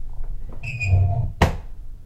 This is a mono recording of a deadbolt lock in a wooden door being slowly locked. This was recorded on a Fostex FR2-LE with an AT897 mic.